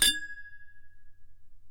Metal water bottle - lifting lid
Lifting the lid off a metal water bottle.
Recorded with a RØDE NT3.
Bottle, Foley, Hit, Impact, Metal, Strike, Thermos, Water